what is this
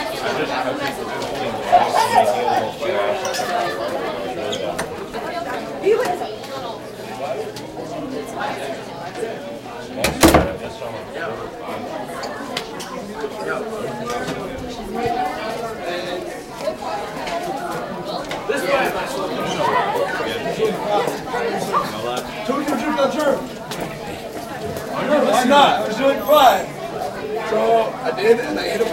GL Hallway 1
Light high school hallway noise in between classes
students, betwen-classes, talking, hs, high-school, hallway